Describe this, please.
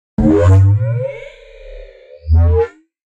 SPACESHIP NOISE. Outer world sound effect produced using the excellent 'KtGranulator' vst effect by Koen of smartelectronix.